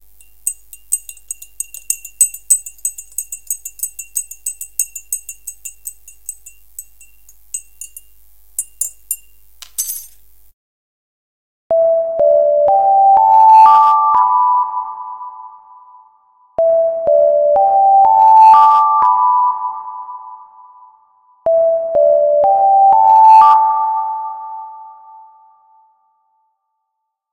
TAI cay kasigi ve cay saati alarmi
Tea spoon recorded with a cheap headset mic. So it has a background noise. The alarm produced using sine wave+reverb.
alarm, spoon, tea, time